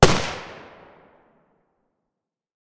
gun lee enfield 303 rifle clean shot
303, enfield, fire, gun, lee, rifle, shot